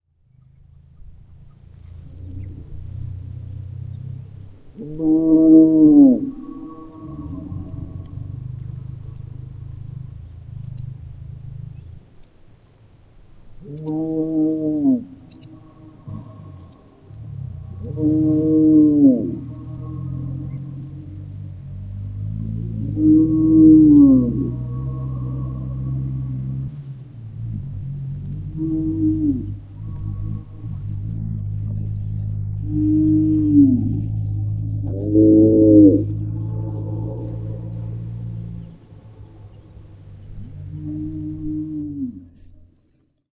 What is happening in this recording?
07091704 IndiaZanskarRangdum CowEcho
Field recording at Rangdum Zanskar, India. Recorded by Sony PCM-D1.
tweet, cow, respondence, bird, morning, zanskar, field-recording, echoes, car, engine, valley, tibet, rangdum, india